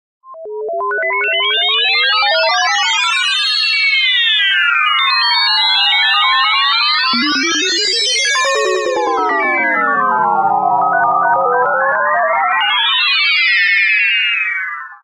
This sound effect was created using beeping effects and then used a audio flanger to create the effect, 200 Mili seconds variable delay, 0.200 Hz and a fixed Delay of 100 Mili seconds.